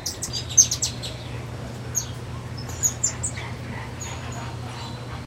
saz tamarin02
Golden-headed Lion Tamarins chirping, a few birds in background.
amazon, dove, grackle, jungle, macaw, monkey, parrot, rainforest, tamarin, tropical, zoo